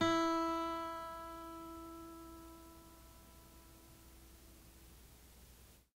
Tape Ac Guitar 6
Lo-fi tape samples at your disposal.
mojomills, ac, vintage, lo-fi, collab-2, lofi, Jordan-Mills, tape